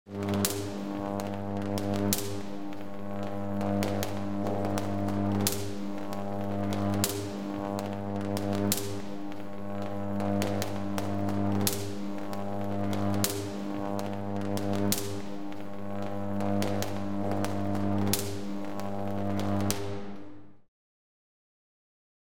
Jacob's ladder
s-ladder, volt, electric-arc, voltage, electricity, electric, laboratory, jacob, Buzz, unprocessed, tesla, high-voltage